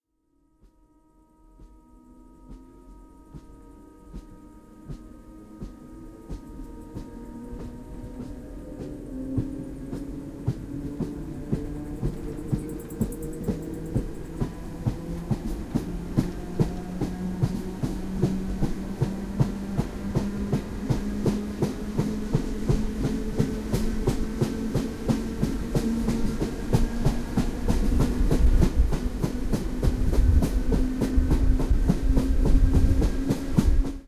A short sound of a train starting, speeding up to almost full speed since it is an older train, communist model.